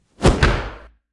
Whoosh to HIT 2
whoosh, impact